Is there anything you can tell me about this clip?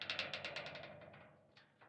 lift 7 - creak
Some lift noises I gathered whilst doing foley for a project
electric, sfx, machine, sounddesign, lift, creak, noise, sound-design